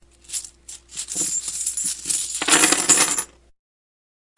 coins dropped on wooden table...
coins, money